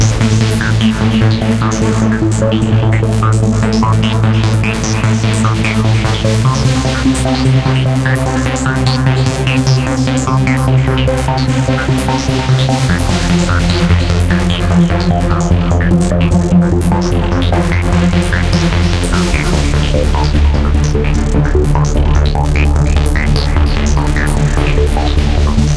Tense Loop
Fitting for a tense scene, chase scene or action scene.
Made in FL Studio using tweaked Arps.
149 BPM
ambience, unnerving, high-quality, horror, tense, dark, loop